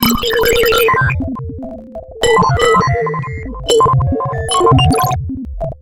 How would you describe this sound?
firstly i've created a few selfmade patcheswith a couple of free virtual analog vsti (synth1 and crystal, mostly)to produce some classic analog computing sounds then i processed all with some cool digital fx (like cyclotron, heizenbox, transverb, etc.)the result is a sort of "clash" between analog and digital computing sounds